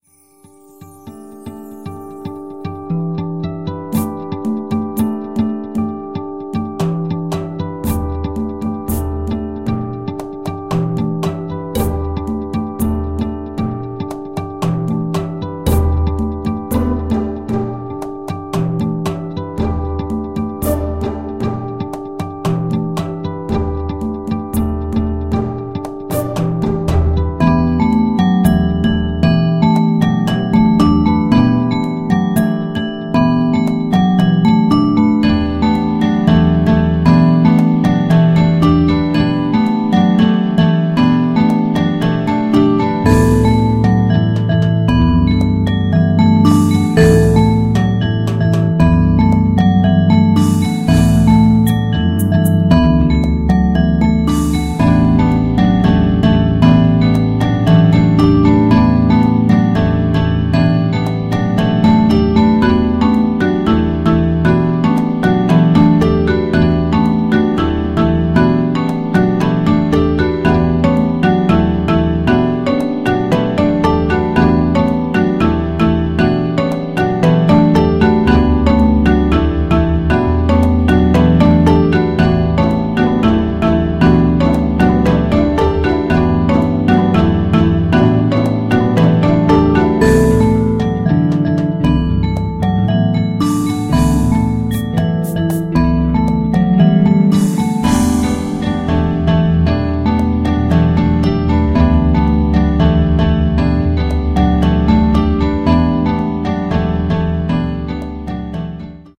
Joy Safari
A happy song with a stable hypnotic 'build up'.
acoustic, ambient, drums, guitar, happy, hypnotic, music, pizzicato, song, strings, xylophone